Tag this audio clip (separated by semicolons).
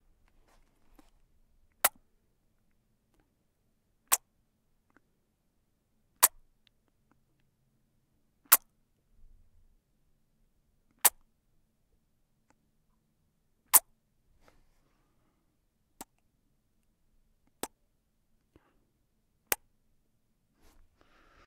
kiss; kisses; kissing; lips; male-kiss; peck; pucker; smooch